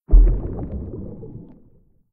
Under Water Splash 3
A short out-take of a longer under water recording I made using a condom as a dry-suit for my Zoom H4n recorder.
Recorded while snorkeling in Aqaba, Jordan. There we're a lot of beautiful fish there but unfortunately they didn't make a lot of sounds.